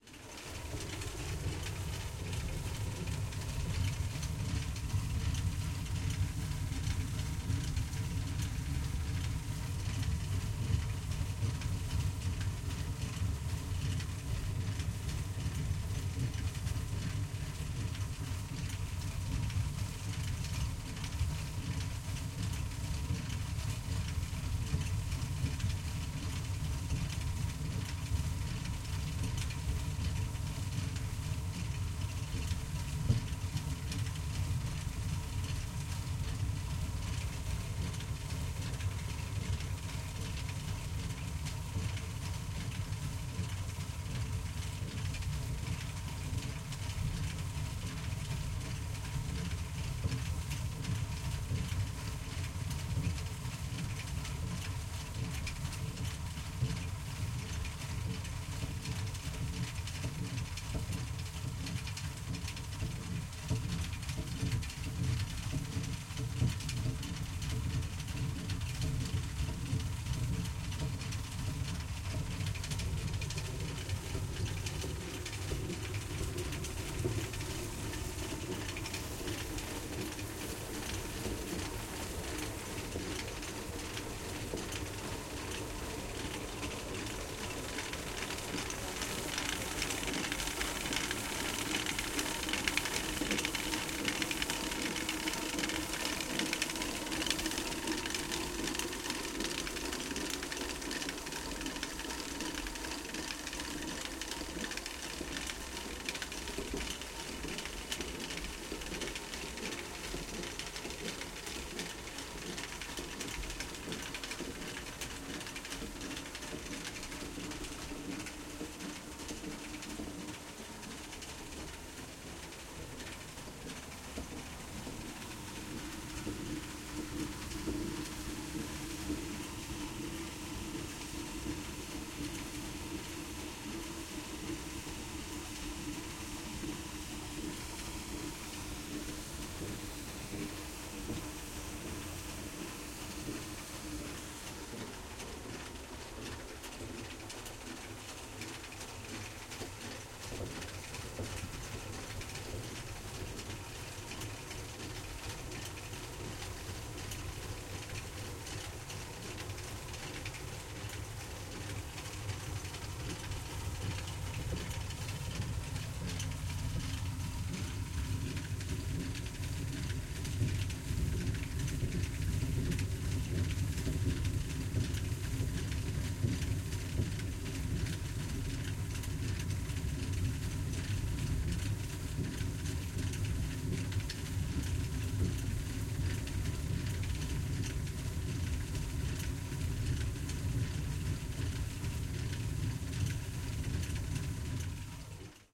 Recording of a stone mill wheel grinding dry corn for flour.
It's in a little stone outhouse, a generator outside powers a belt driven stone wheel. The recording changes in perspective and cycles from the lower part (a wooden crate to receive the milled flour) to the upper element (a large plastic funnel in which the dried corn grains are tipped) and then back down again.
Recorded in 2010.